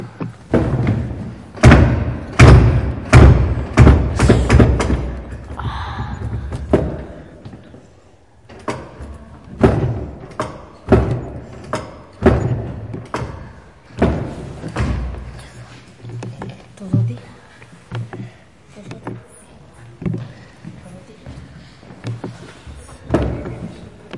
OM-FR-porte
Ecole Olivier Métra, Paris. Field recordings made within the school grounds. The door slams..
France, recordings, school, Paris